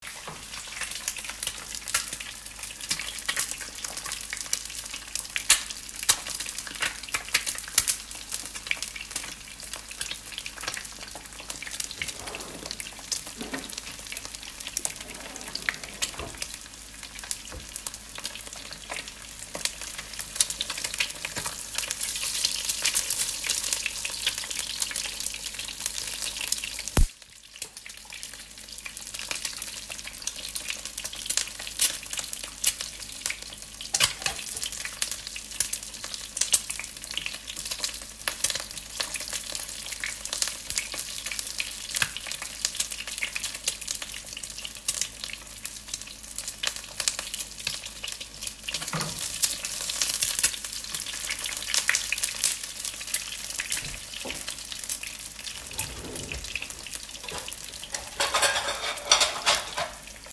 Frying an Egg
This morning, Min Min fries an egg. He gets a spoon from the drawer, and flips the egg. The oil is splattering and pops.
eggs, frying, sizzle